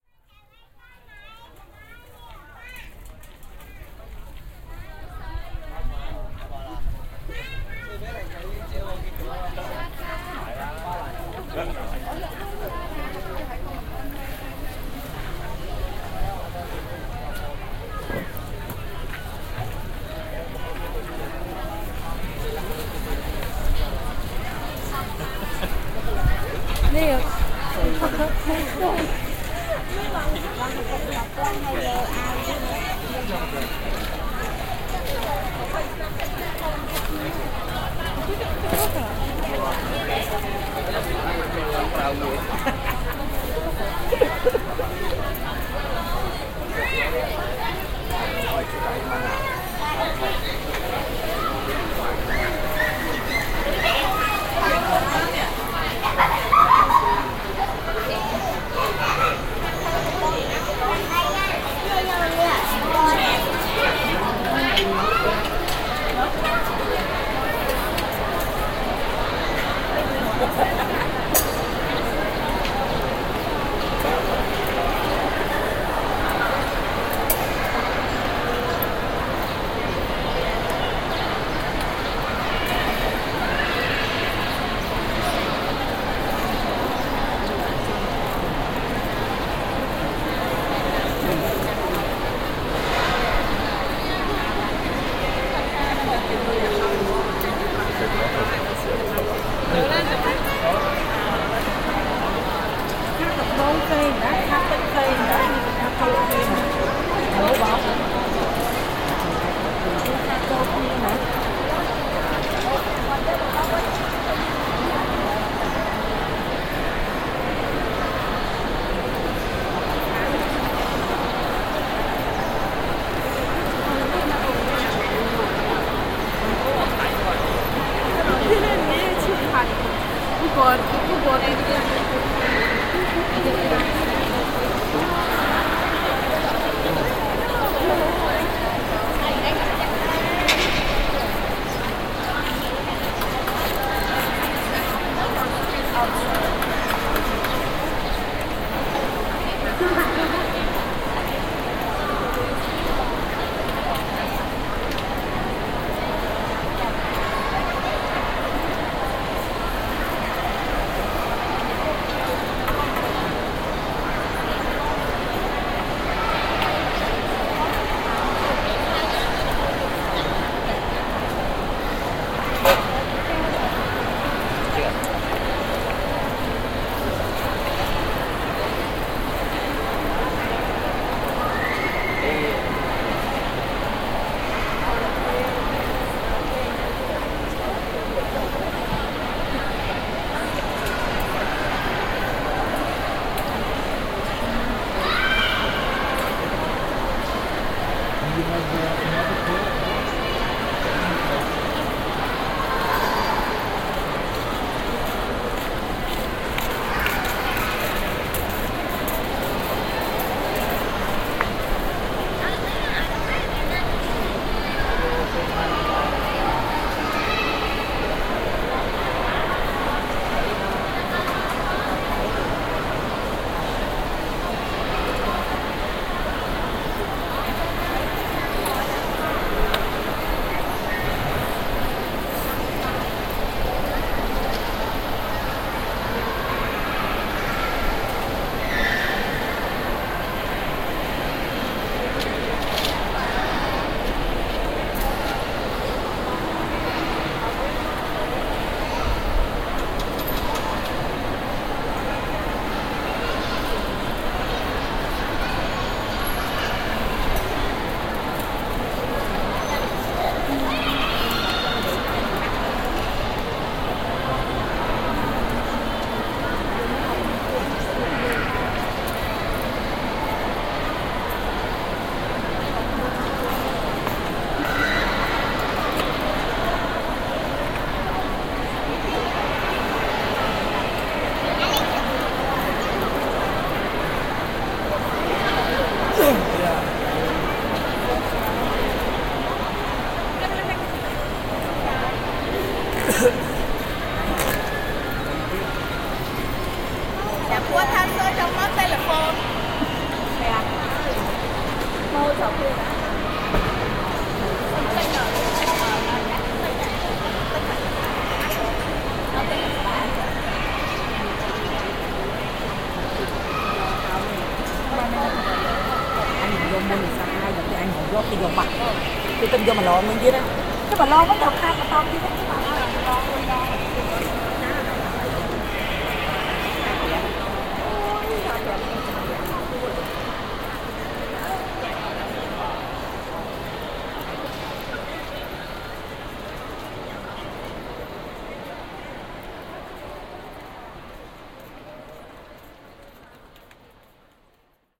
phnom penh's art deco central market (psar thmei in the local language, which translates as "new market") is a fascinating and intensely beautiful structure. on the inside as well as the outside. it is one of these buildings which consist of a central hall (domed in this particular case) with its unique sonic properties immediately striking the attentive listener upon entering.
this recording is intended to share this experience - a browse through the seemingly infinite amount of jewellery stalls. a sphere which tries to evoke eternal glamour and pride. grandeur. glistening jewels on cheap alloy. bling. heavenly voices seducing the shopper in a sacred place. enjoy.
the recording equipment for this session consisted of a roland R-05 solid state field recorder plus the roland CS-10EM binaural microphones.